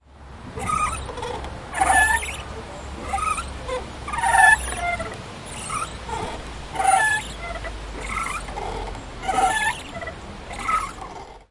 some swing squeaking a lot